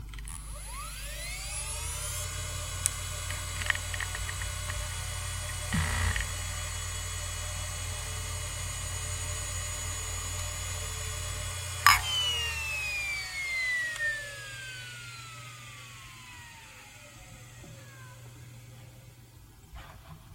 Quantum Fireball EX - 5400rpm - BB
A Quantum (G3 PowerMacs came with them) hard drive manufactured in 1998 close up; spin up and spin down.
(FIREBALL EX6.4A)